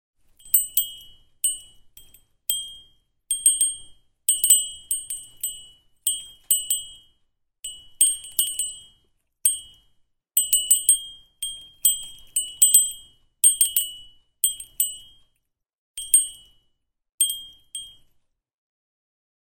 small bell
bell, small, windchimes